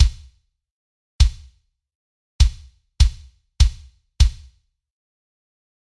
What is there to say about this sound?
Metal Bass Drum
Perfect Metal Kick/Bass Drum.
bass, beat, blast, breakdown, deathcore, double, drum, hardcore, heavy, kick, tight, wet